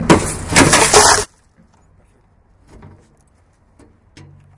crush hit metallic voice
Sounds recorded by participants of the April 2013 workshop at Les Corts secondary school, Barcelona. This is a foley workshop, where participants record, edit and apply sounds to silent animations.
Metallic hit and crushing; voice.
crush, foley, hit, lescorts, metallic, voice